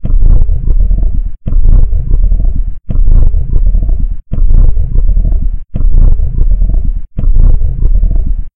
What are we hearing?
putrid heartbeat
As with everything in the Music Loops pack, I made a weird vocal noise and distorted it like hell. This could be a sound used in a horror video game to be the ambient sound of some gross, putrid creature, or this could be used for that same reason, but in an adventure game where this is a creature you have to fight. But of course, you do not have to stay in these guidelines. Be creative!
heartbeat, horror, ambient, loop, monster, creature